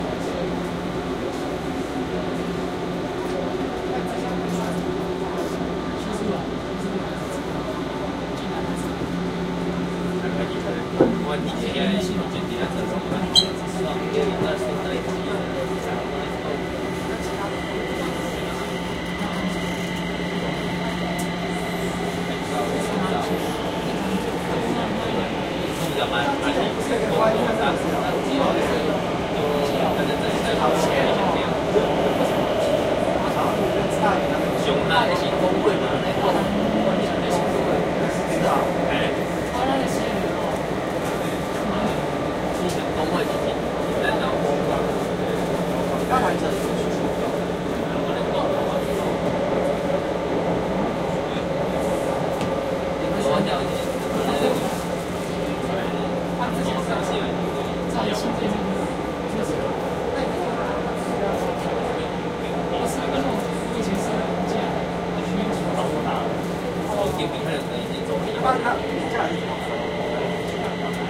This is on the Kaohsiung MRT train. I just got my Rode Blimp and wanted to try it out in a stealth recording setup. It was kind of a test.
Shockmount: Rode Blimp
Location: about 1 foot off the ground; end of car, in front of doors